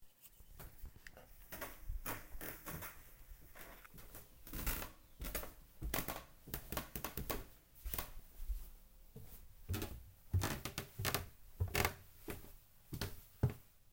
Walking down two short flights of Squeaky stairs
squeaky, Stairs, walking